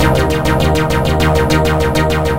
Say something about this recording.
another3oh3
A short moog sample with a science fiction sound. would make a great backing driving sound for some kind of 80's sci fi movie soundtrack, ala john carpenter.
moog, one-shot, techno, industrial, driving, synth